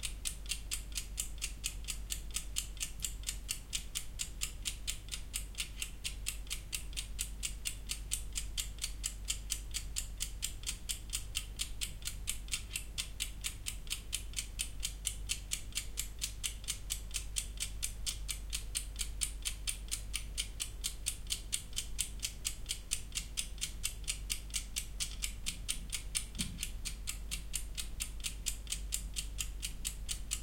To test some microphones and preamps I used the following setup: A Sony PCM-D50 recorder and an egg timer. Distance timer to microphones: 30 cm or 1 ft. In the title of the track it says, which microphones and which preamp were used.